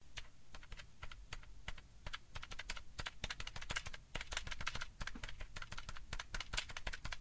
Hitting a button
Repeatedly pressing a button of my gamepad. Can be used for elevator calling buttons and such.
button, click, elevator, gamepad, press, short, xbox360